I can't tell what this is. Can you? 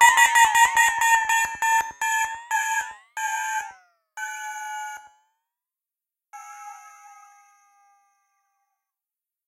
Metallic Bird Sweep
effect, fx, riser, rising, sound-effect, sweep, sweeper, sweeping